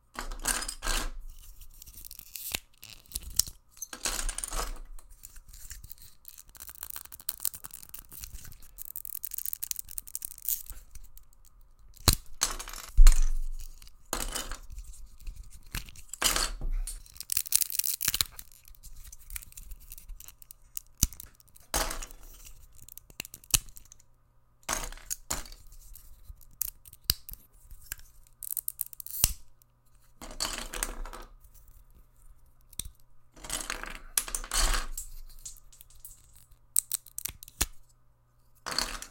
Rubbing and breaking apart legos.
crunching, legos, plastic